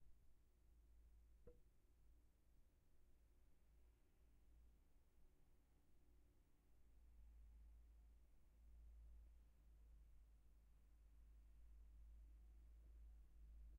160170 Quit Room Ambience OWI
The ambience of a quit room
Room, Project